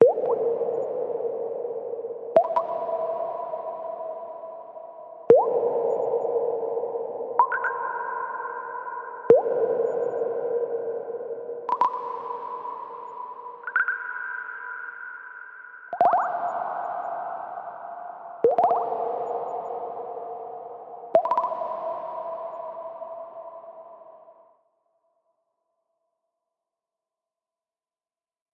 Water synth drops falling in large space.